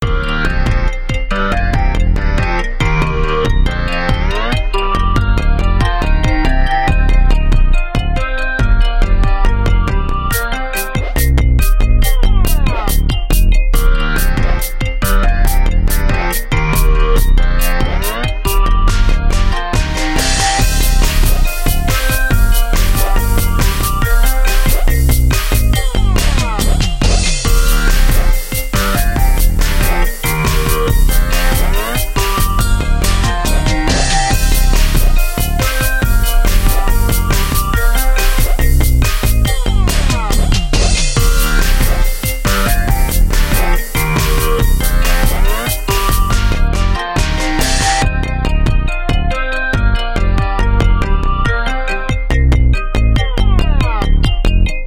Original music loop at 140 BPM. Key of C-Minor. Funky Synth, Bass and Drums.
140, Bass, BPM, C-Minor, Drums, Funky, loop, music, Synth